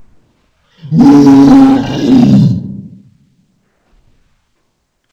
monster sound 2
Drone, Horror, Halloween, Creepy, Sound, Alien, Spooky, Monster, Scary, Evil, Dark, Laugh, Scream, Original